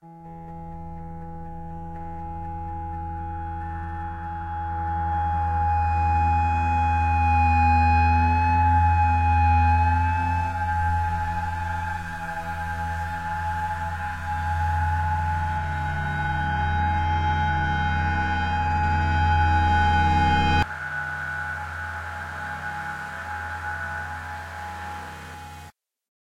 Guitar Wail
Evolving Guitar sound
Guitar, Feedback, Wail, Fuzz, Humm, Time-stretch, Evolving